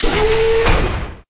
robot step short medium-heavy
hydraulic, industrial, metal, robot, step